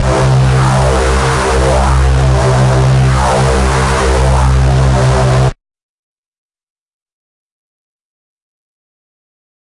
multisampled Reese made with Massive+Cyanphase Vdist+various other stuff